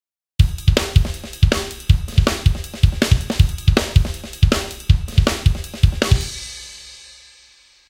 DayvFunk with Ride
this is a funky old-school breakbeat inspired by the amen break and other such beats. Created with Reason 4.0 and the Reason Drumkits 2.0. this is the version with the ride instead of the hihat.
amen, breakbeat, drumnbass, funky, jungle, old-school